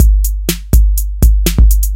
A break beat made with my Roland 808, have fun, don't forget to rate :)